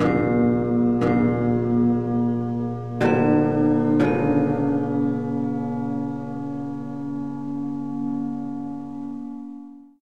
4 strange orchestra hits